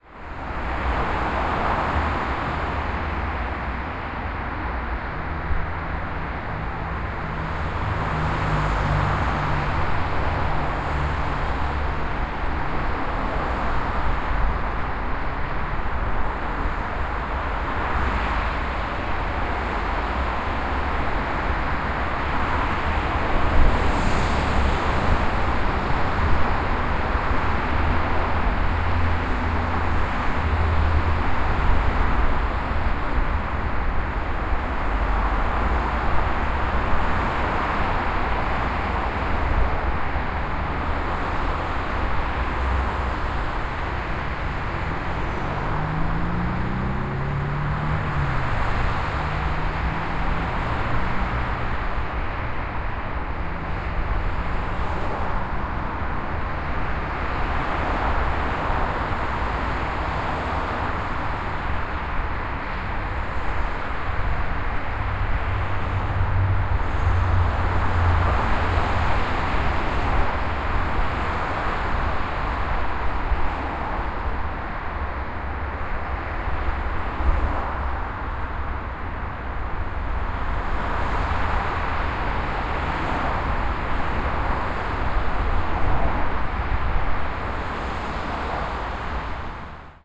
Binaural field-recording of the traffic noise from a bridge over the Ronda de Dalt in Barcelona, in a windy day.
street, noise, okm-II, car, traffic, cars, transit, ronda-de-dalt, zoom, ambience, road, soundman, h4n, city, field-recording, barcelona, binaural